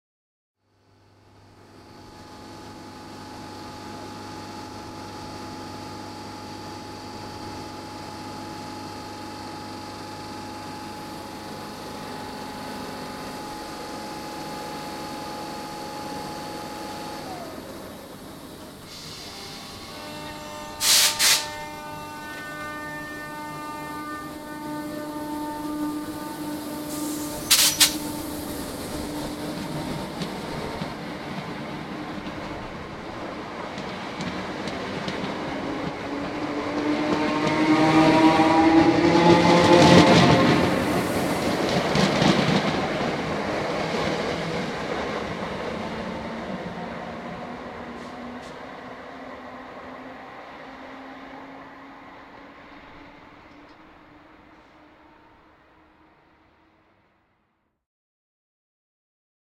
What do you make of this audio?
Train leaving station
Recorded on Marantz PMD661 with Rode NTG-2.
Platform recording of a train pulling out of an open-air station.
ambience, depart, departing, departure, field-recording, people, platform, rail, railway, railway-station, station, train, train-station